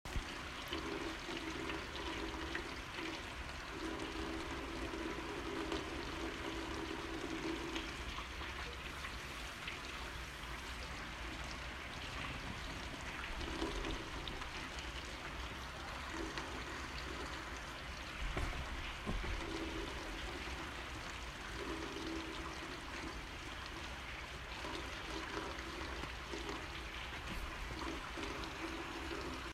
rain recorded from the window with a phone.